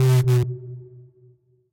Same as allydmg but bit crushed.
notch, crush, short, bit, lfo, filter, reverb, square